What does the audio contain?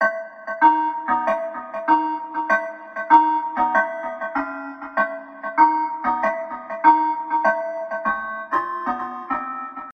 Moving Light
This is the sound of light trying to find it's emotions finding it's self of what it really is,like why am i blue or why am i red or orange.
light, piano, synth, synthesise